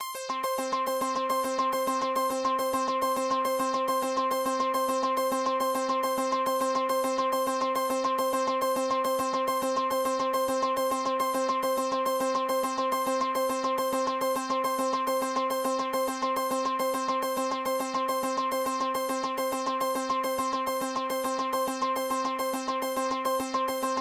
80bpmloops8bars09arp
Syhth loop, uncut, analouge and strange.